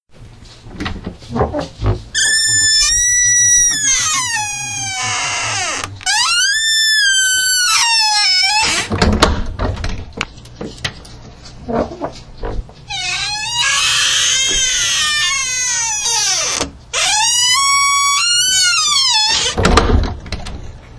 Door Squeak Close
My 100 year old pantry door opening and closing, recorded using an Olympus VN-6200PC digital voice recorder. This is an unedited file. It is very squeaky!
door, squeak, squeaky, squeaky-door